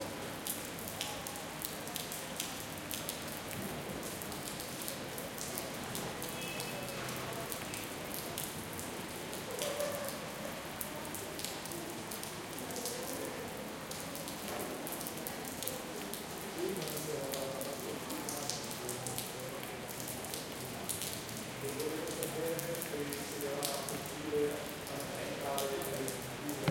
Rain on street 2
Rain on the street